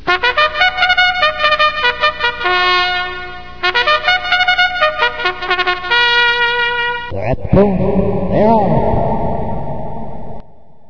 Horse race track opening trumpet sound and announcer saying "they're at the post, they're off"
call-to-the-post, fanfare, horse-racing, race-announcer, race-track, start, theyre-off, trumpet